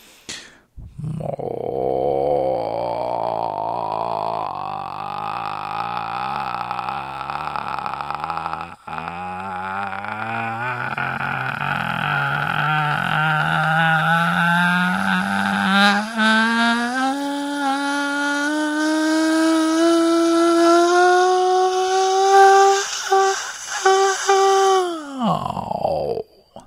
Gregorian Rising 15b 135bpm
Gregorian chant vocal drone which rises in pitch
creative, loop, dare-19, beatbox, bfj2, drone, vocal